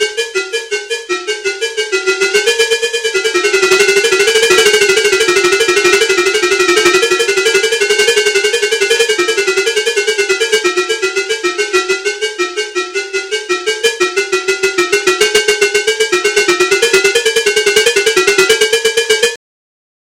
Grained-Cowbell-FXa
Design ambient grained cowbell effect.
cowbell, fx, ambient, grained